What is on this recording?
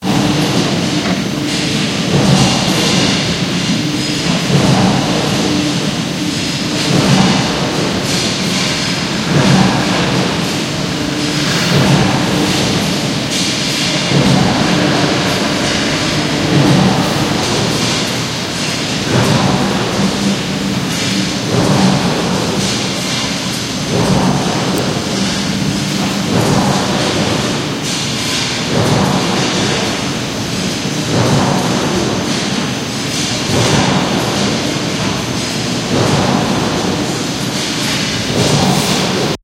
Noises from a factory recorded from outside. The factory shapes metal parts with a punching press. You hear the punches as well as machine noises and metal parts being moved.
Recorded with a Samsung SIII phone, multiband compressed and equalized afterwards.